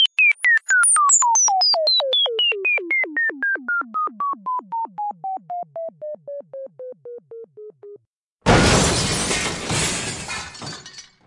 A sound of a computer falling down and crashing as it hits the ground.